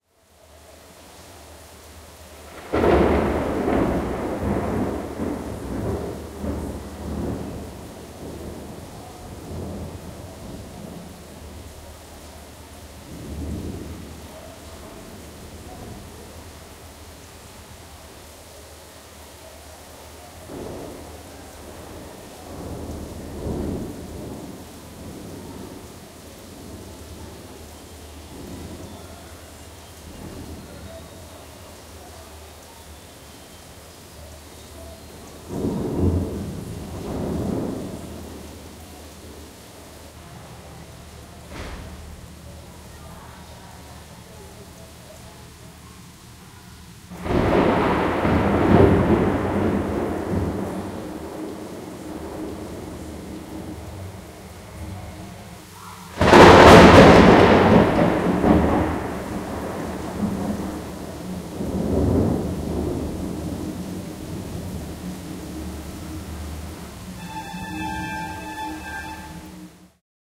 Santa Clara thunderstorm party
I took this recording from my room in a beautiful casa on the main square in Santa Clara. It was warm and not windy so I could keep the windows open during the storm, and eavesdrop on this little party, which seemed to warm up as the storm did.